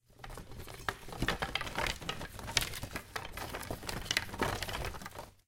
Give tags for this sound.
Bones
Rattle

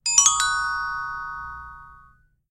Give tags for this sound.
Sonido-xilofono
Xilofono

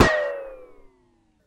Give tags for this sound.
ting
ping
shoot
gun
metal
bang
ricochet
crack
wood
snap
pow

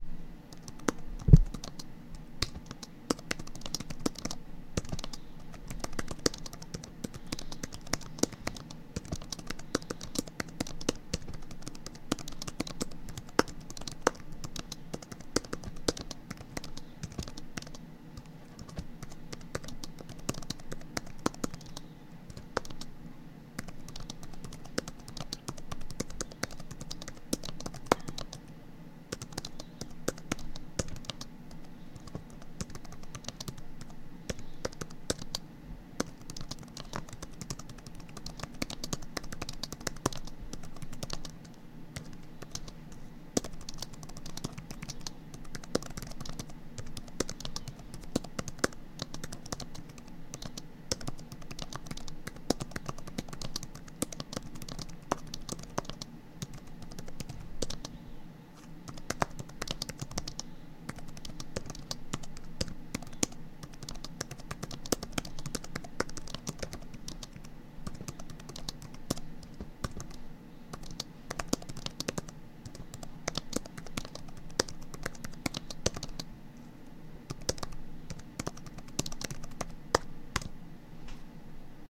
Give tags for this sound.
asmr
Keyboard
sound
typing

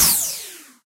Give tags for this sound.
projectile
gun
star-trek
fire
shot
lazer
shoot
sci-fi
warfare
shooting
space
military
firing
weapon
laser
beam

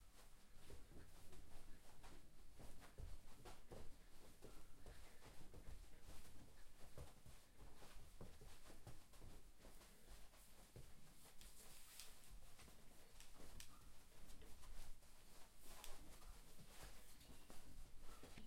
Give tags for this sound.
feet
dance

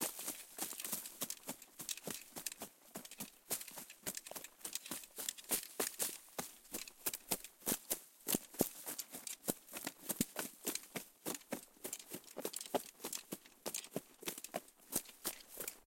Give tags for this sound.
field-recording
soldier
grass
foley
rustle
metal
branches
forest

